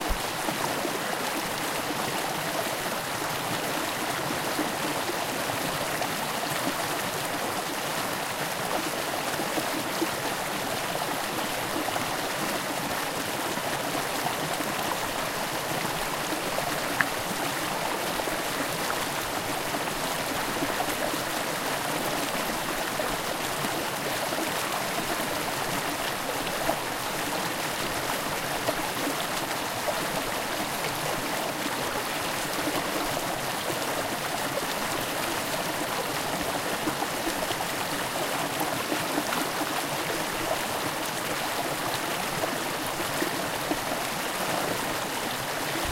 A very close up recording of a stream in the middle of a Scottish winter.